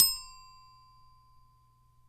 Multisample hits from a toy xylophone recorded with an overhead B1 microphone and cleaned up in Wavosaur.